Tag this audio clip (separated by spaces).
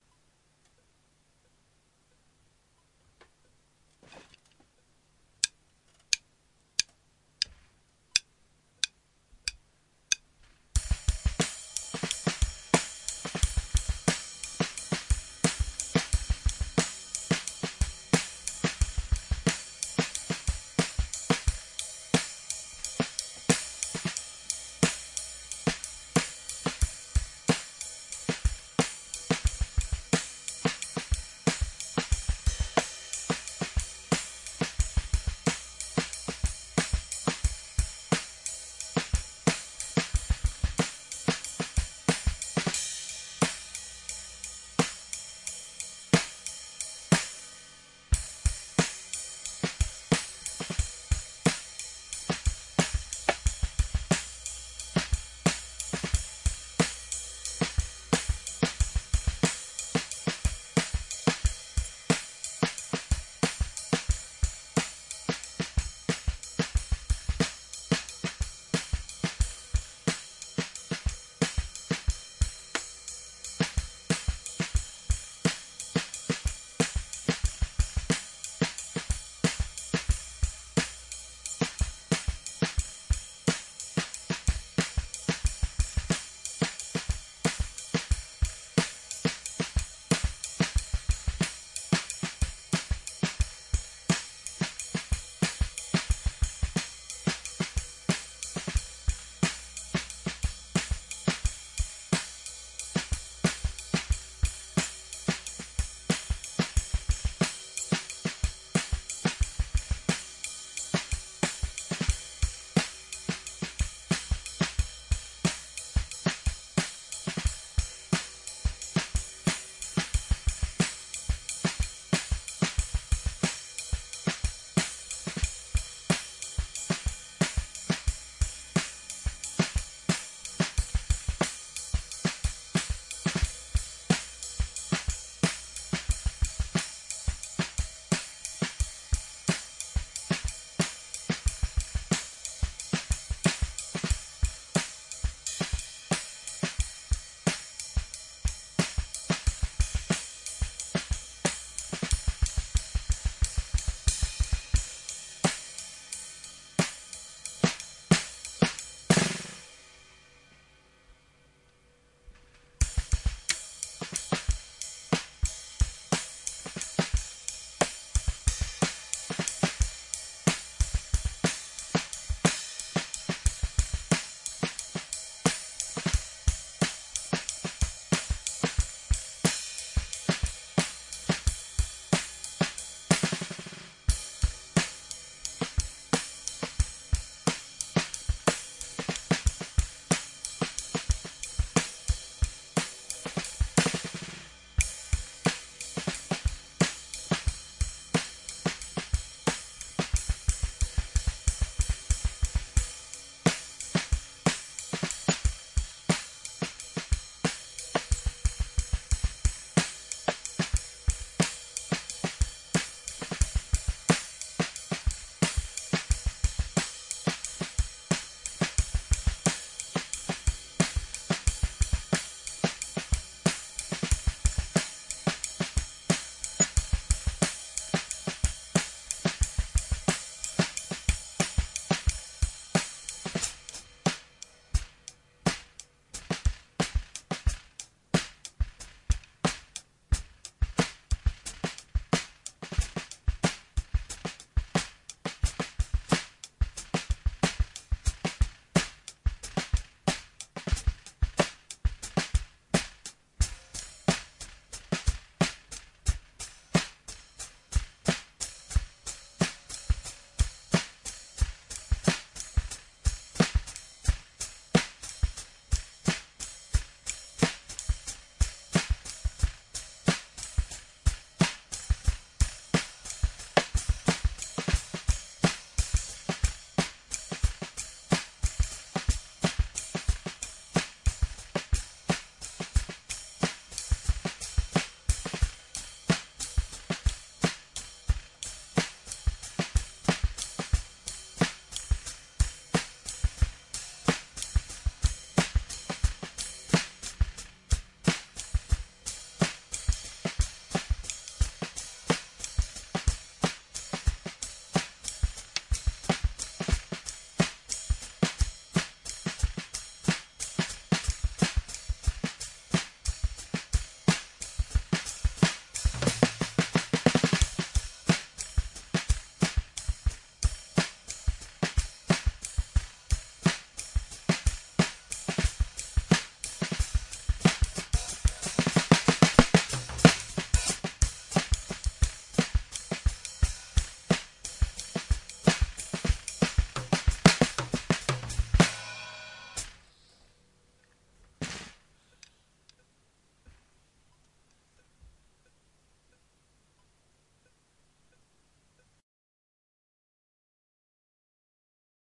ace bournemouth download drum free funk jazz london manikin robot samples shark space time